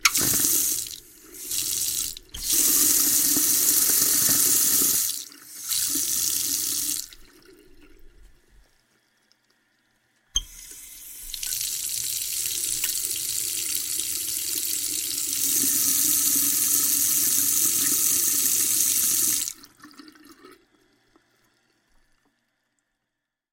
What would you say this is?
water bathroom sink faucet on off
bathroom, faucet, off, sink, water